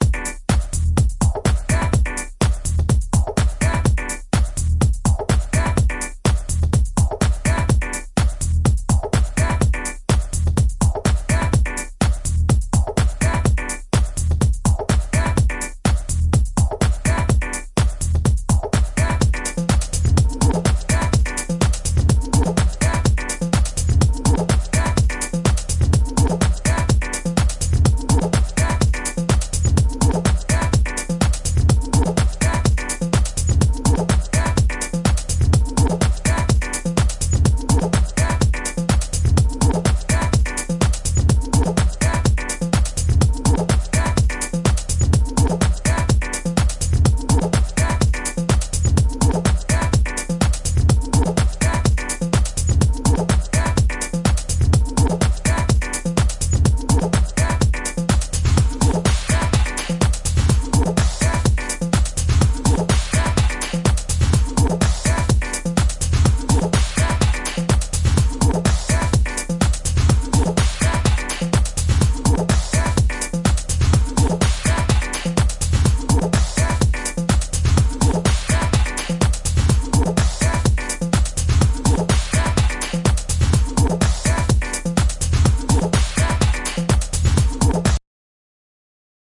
Electonic Music
Electonic, Music, hip, Free, hop, beats, fun, Sound, funky